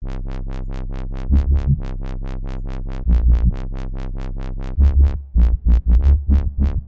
wobble lead/drop
a wobble lead or drop whatever u wobble lovers desire!!!!
bazz, free, fx